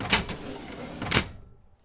Slide Projector
If you use this sound I would love it if you could send me a message saying what you did with it.